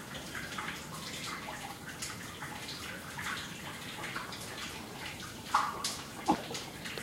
Drips Underwater
Water drips underneath a grate found on a construction site.
cave, drip, drop, echo, field-recording, ping, pling, reverb, splash, under, underwater, water